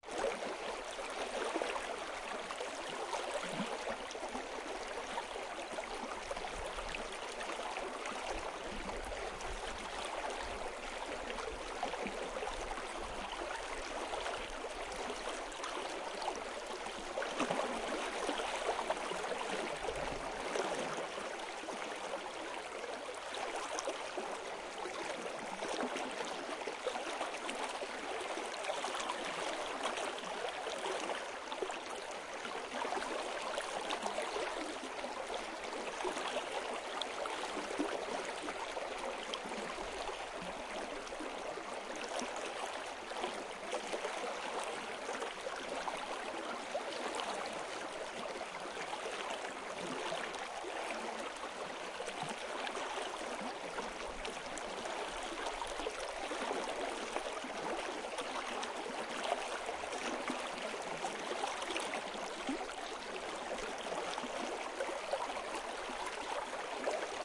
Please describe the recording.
ruisseau FournolsHC 1
water streams recordings
recordings, streams